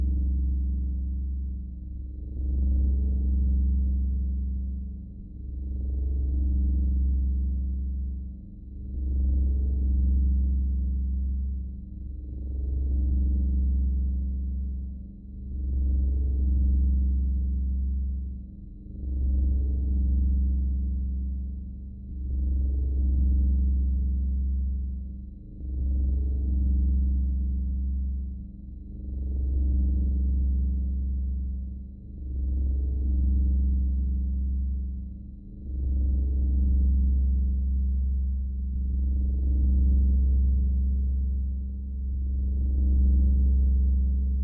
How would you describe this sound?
Sci-Fi energy shield. Synthesized with VCV rack.
Synthetic, Energy-Shield, Energy, Sci-Fi, Shield